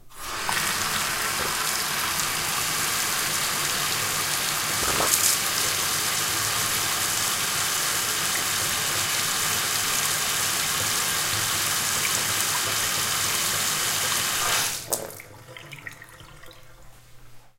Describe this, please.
Sound of my bath water running.
bathroom, water